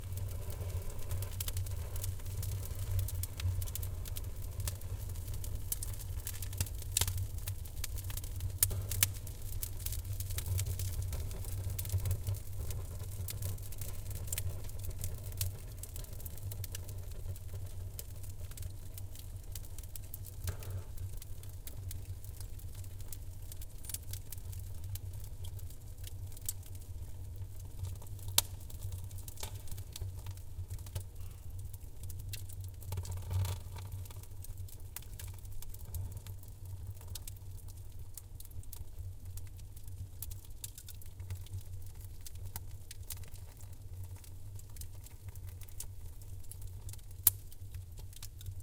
oheň kamna2
fire, fireplace, flame, flames